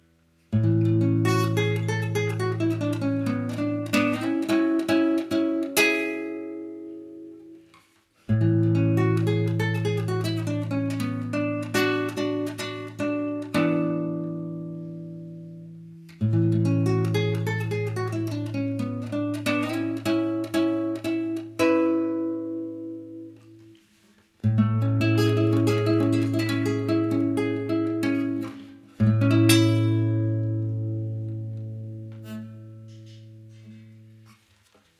Classical guitar sweet español melody
This is a gentle melody on classical acoustic nylon-strings guitar, playing by arpeggio, gently and quilty. Minor español sentimental mood. Clean signal, without reverb or another different effect. Free tempo.
acoustic, arpeggio, atmosphere, chord, Chords, classic, classical, clean, gently, guitar, minor, nylon, open-chords, quilty, sentimental, strings